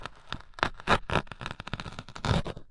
cutting carrot 3
Cutting a carrot with a large cleaver, for variety, as slowly as possible. Recorded with a Cold Gold contact mic into a Zoom H4 recorder.
foley, scrape, carrot, knife, kitchen, food, contact